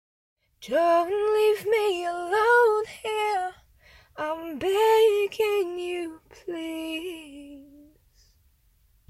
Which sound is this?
'Don't leave me alone here'
A female voice singing a line that could be used in a song. :) (Sorry for lack of detail, I'm pretty busy nowadays)
female, girl, lyrics, singing, song, vocal, voice